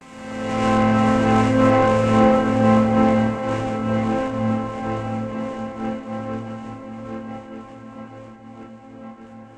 An acoustic guitar chord recorded through a set of guitar plugins for extra FUN!
This one is just plain ol' Amin.